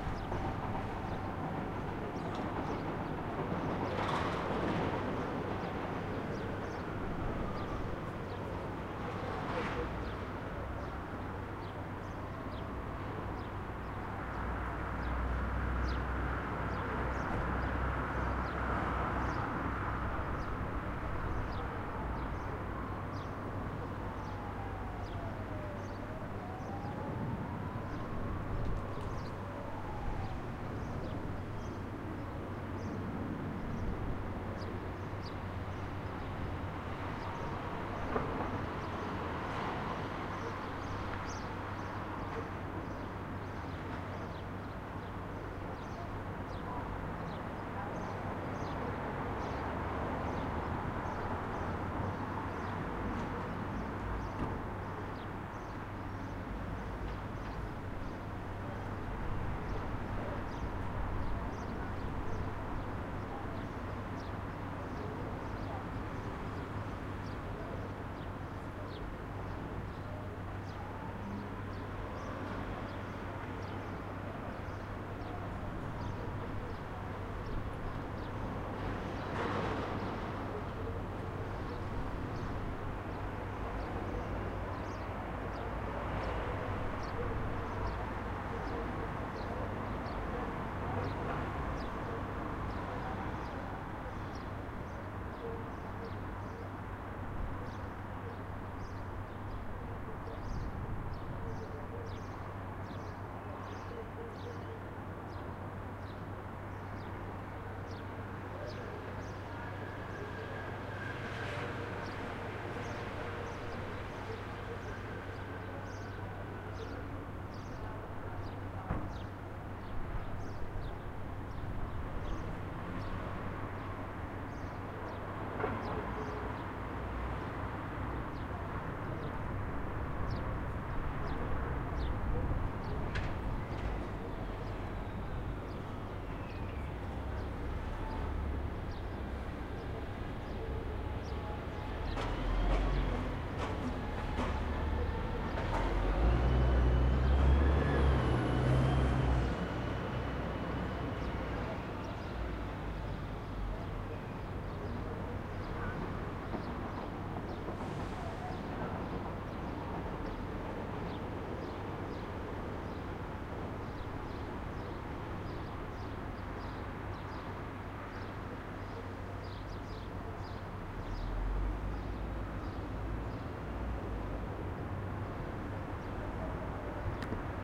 Ambience EXT day subcity rooftop traffic birds train (eka palace lisbon)
Field Recording done with my Zoom H4n with its internal mics.
Created in 2017.
eka, birds, traffic, subcity, Ambience, EXT, train, lisbon, day, palace, rooftop